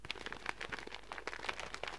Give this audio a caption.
4 - That paper
Paper sound effect
again, paper